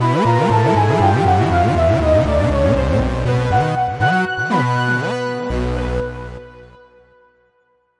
short loops 13 02 2015 4 game over 1
made in ableton live 9 lite
- vst plugins : Alchemy
you may also alter/reverse/adjust whatever in any editor
please leave the tag intact
gameloop game music loop games techno house sound